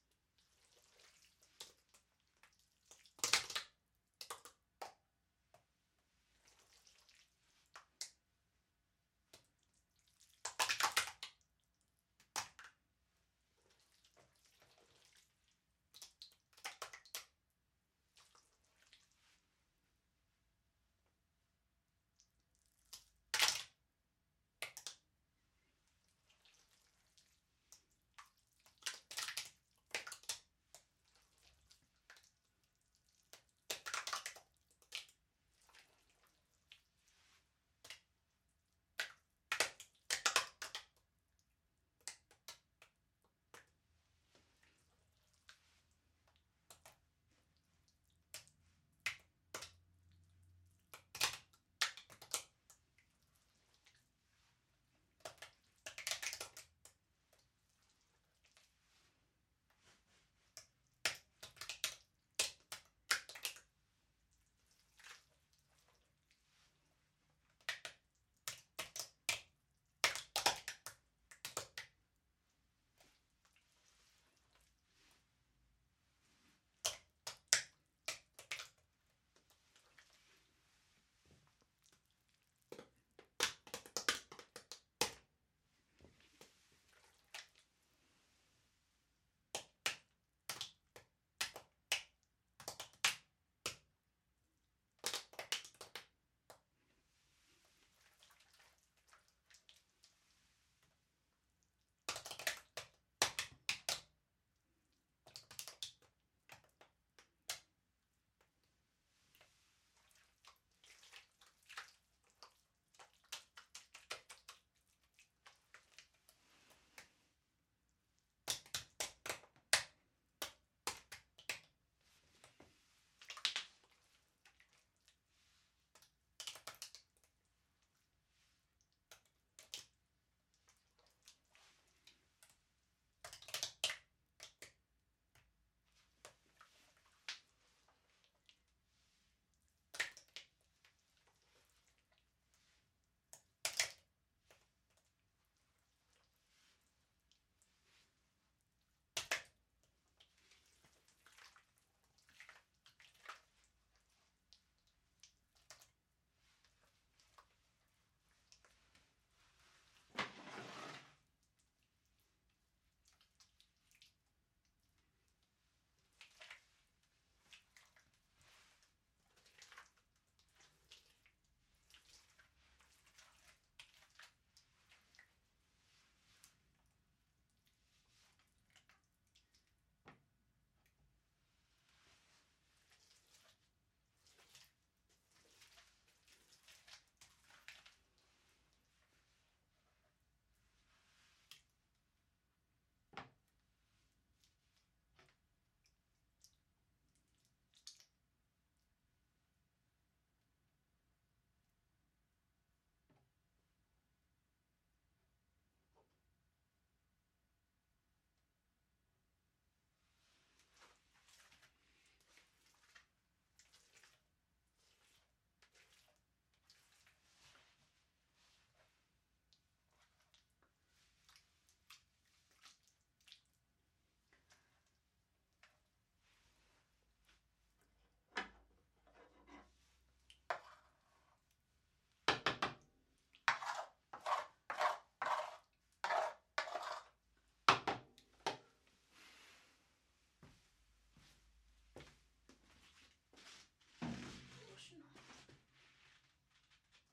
Some Jelly falling on a wood surface,
Recorded with a KSM Condenser mic.
Hope it works!